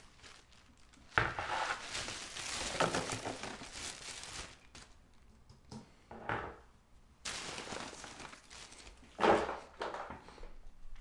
Collecting items off of a table and dumping them into a garbage bag. Recorded with a Sennheizer ME66 onto a Zoom H1 recorder.